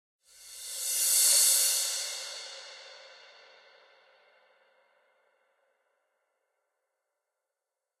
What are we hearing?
cymbal-sizzle-reverb-high
paiste, cymbal, white, scrape, production, zildjian, drums, ride, crash, percussion, sizzle, splash, sabian, cymbals, 1-shot, hi-hat